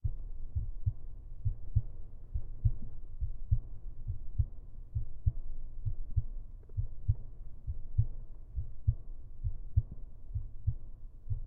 Made by pressing AKG C414 condenser firmly to the chest: voila, the human heart. This one is true to the real sound, like when you hear the blood rushing in your ears. But if you need a louder and extended version as a sound fx then use the HeartbeatEnhanced sample in this set.
beat, blood, heart, heartbeat, pumping, real, rush, rushing